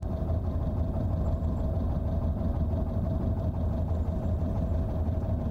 Volkswagen Type 2 inside car engine
volkswagen type2 engine sound from inside the car